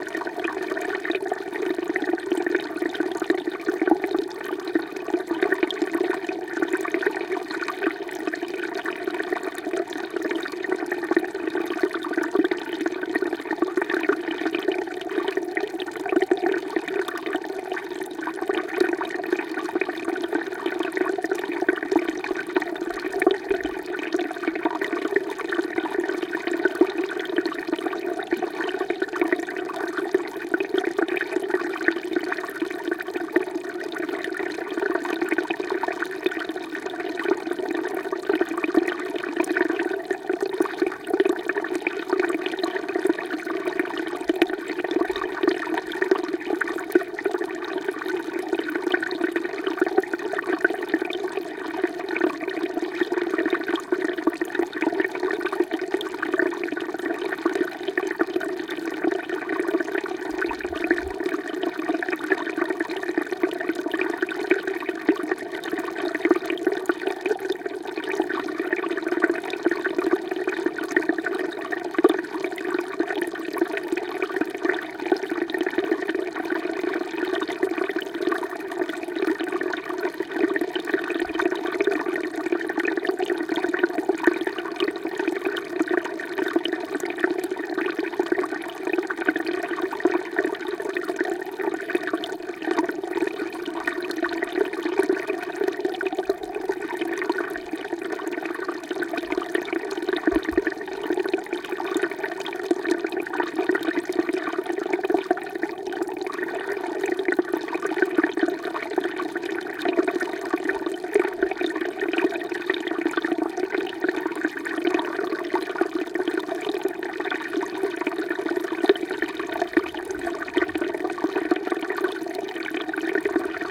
Water trickling beneath a field of boulders.